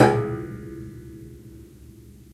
samples in this pack are "percussion"-hits i recorded in a free session, recorded with the built-in mic of the powerbook
hit, piano, string, unprocessed